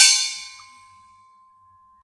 These are sounds made by hitting gas bottles (Helium, Nitrous Oxide, Oxygen etc) in a Hospital in Kent, England.
bottle, gas, gong, hospital, metal, percussion